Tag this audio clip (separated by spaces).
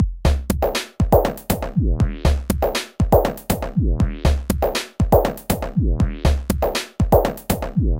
electronic loop percussion